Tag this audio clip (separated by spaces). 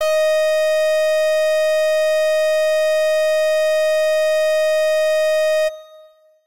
brass fm-synth synth synthesizer